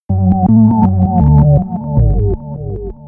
My Experimental sound recorded at 80bpm.